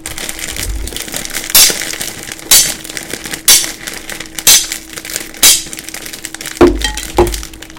A fire with a blacksmith hammering on an anvil